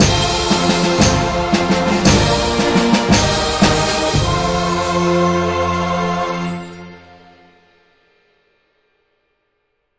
Jingle Win 00
An uplifting and divine orchestral jingle win sound to be used in fantasy games. Useful for when a character has completed an objective, an achievement or other pleasant events.
celebrate, complete, gamedeveloping, gaming